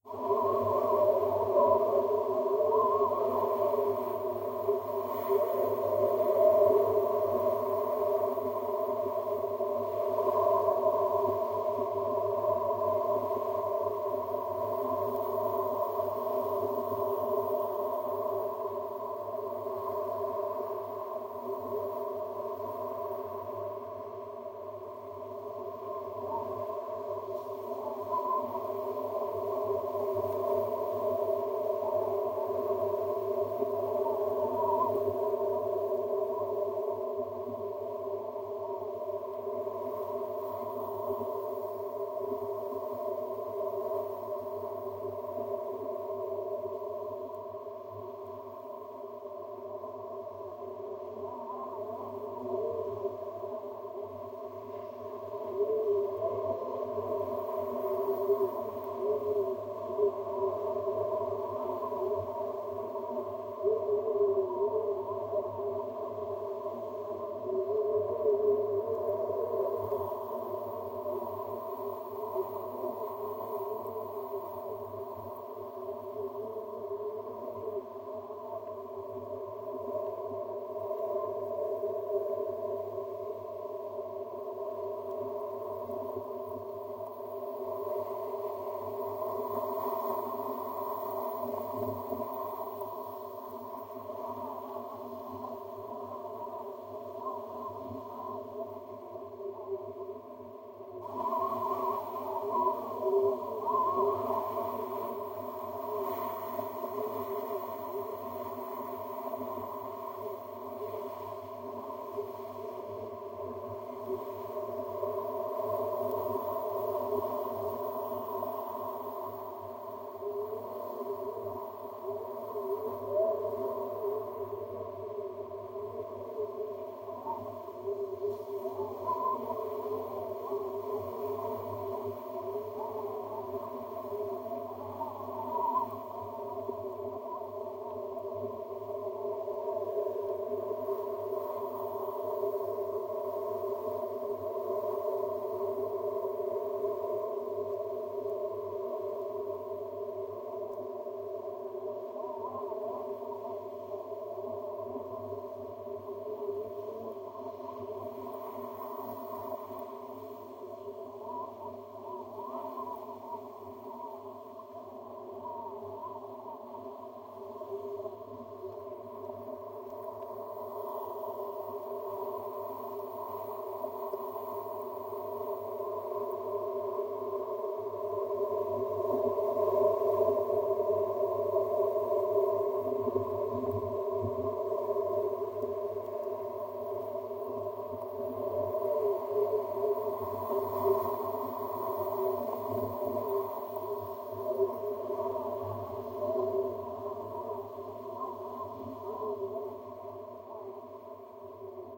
Winter Wind Mash-Up fast 2
Same as the previous one except I used Audacity to change the dynamics of the sounds with the equalization effect in audacity so that it sounds like a completely different environment. Enjoy!
I also did this dynamics thing with "Winter Wind Mash-up fast"